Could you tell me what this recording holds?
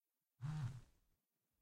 vibrating phone on table
A mono recording of a cell phone vibrating on a wooden table.